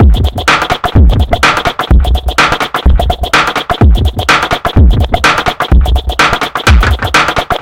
abstract-electrofunkbreakbeats 126bpm-directorscut
this pack contain some electrofunk breakbeats sequenced with various drum machines, further processing in editor, tempo (labeled with the file-name) range from 70 to 178 bpm. (acidized wave files)
abstract, beat, breakbeats, club, dance, distorsion, dj, drum, drum-machine, effect, electro, elektro, filter, funk, hard, heavy, house, loop, percussion, phat, processing, producer, reverb, rhytyhm, soundesign, techno